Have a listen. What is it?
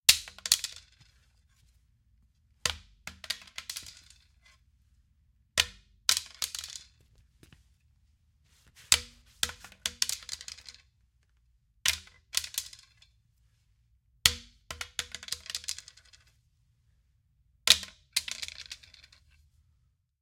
Dropping a small tennis racquet on a concrete floor. Other movements of me picking it up too.
racquet, concrete